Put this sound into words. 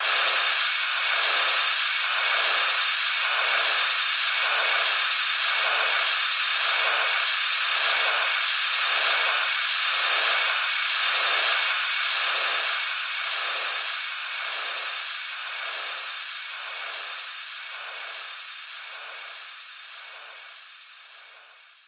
Street sweeper filtered mod.1
Street sweeper sound processed
source: